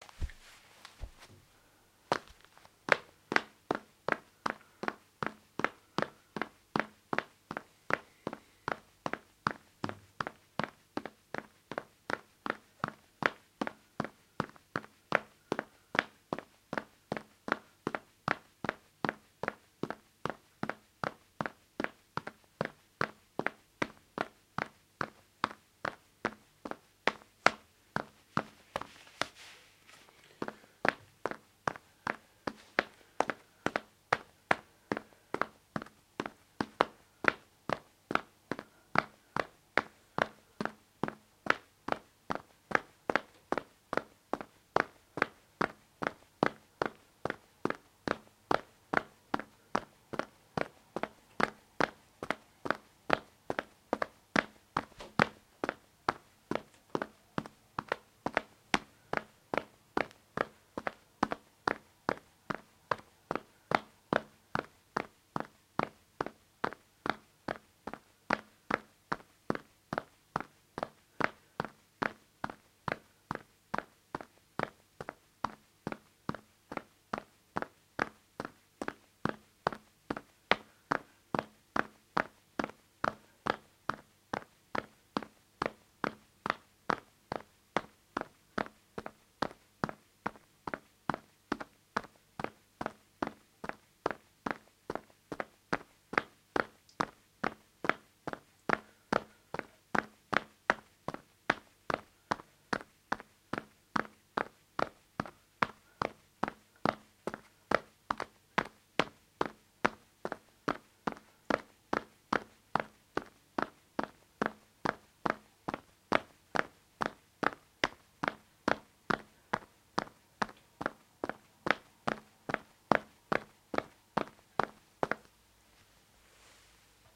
Hard-soled sneakers on a tile floor with a fast pace. Recorded using a Shure SM58 microphone.